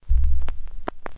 recording of the hiss created by a needle being placed on an old record; not processed
click, field-recording, hiss, needle, turntable